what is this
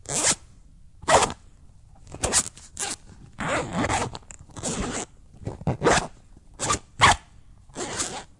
Zipper sounds / Several zips
Several zipper sounds
bag, clothes, coat, fasten, fastener, fastening, fly, folder, jacket, jeans, pants, parachute, pillow, pillowcase, purse, undress, unzip, zip, zipper